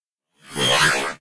Tech Glitch 10 Serious Glitch
glitch, effect, generated, lo-fi, fx, electric, noise, abstract, sfx, low